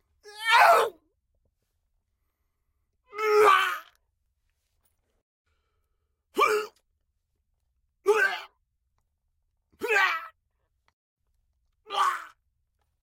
Aaron Helm
Some good fighting screams
fight, funny, gut, scream, flying, punch